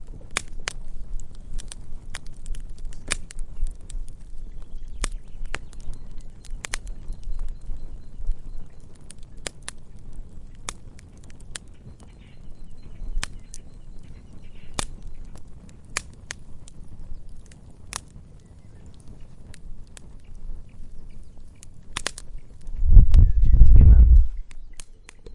Fire recorded outdoor in our land in Granada. Some birds can be heard in the background, including a partridge.